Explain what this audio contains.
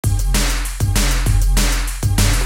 196 bpm breakbeat
Breakbeat made from Vaytricks Beatmachine.
beat, Beat-machine, Beatmachine, break, breakbeat, breaks, dnb, drum, drum-loop, drumnbass, drums, groovy, loop, Vaytricks